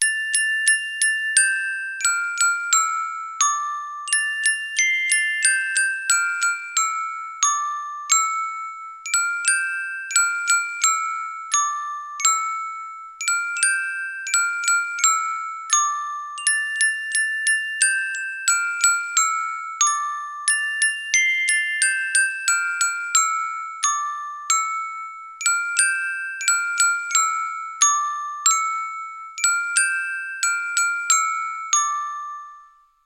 A folk song named ‘A birch stood in a field’ (‘Во поле берёза стояла’).
Sound by my Casio synth.